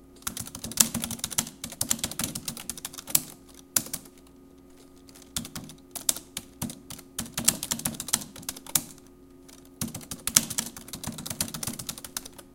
Typing pc
typewriter, typing, writer